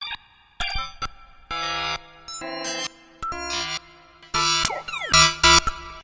Alien UFO blip bleep blast ray radar satellite tech space electronic synth
Alien tech sounds in separate wave forms, to splice up or use consecutively as recorded.
"Raiders of the Lost Clam!!!" uses freesoundorg sounds!
command, freaky, horror, Luke, score, solo, star, trek, wars